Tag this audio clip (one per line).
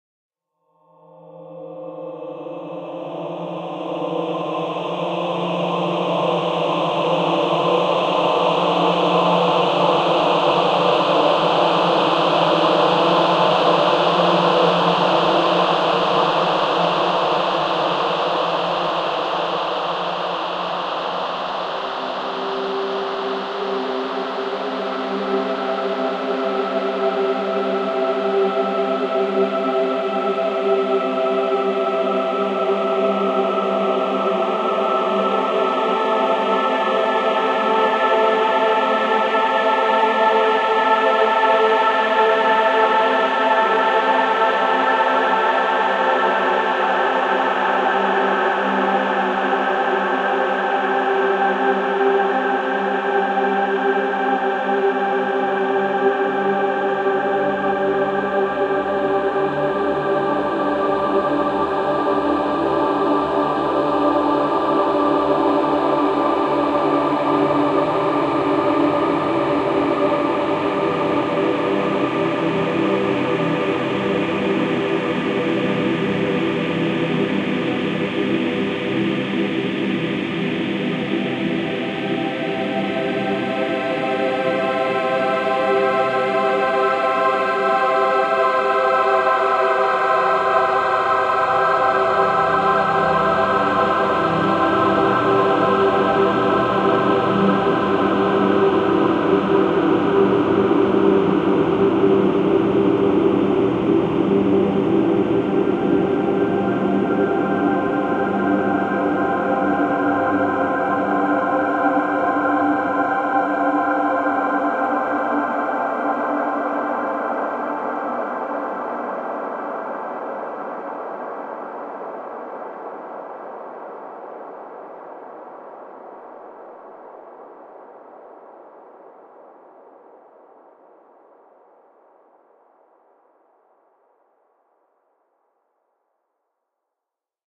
ambiance
ambiant
ambience
ambient
angel
angelic
demon
demons
devil
evil
god
heaven
hell
horror
lucifer
realm
satan
soundscape
spirit
spiritual